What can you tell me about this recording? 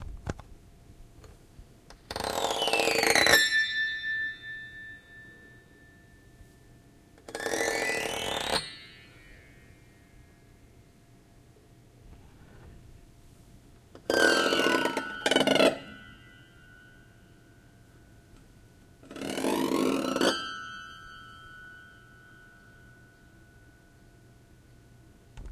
piano strum
recording of the strumming of the piano strings on a mid sized grand.
instrument, weird, piano, strum